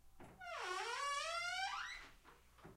Creaky Door 1
Creaky Door Noise, 3 mics: 3000B, SM57, SM58
creak, door, slam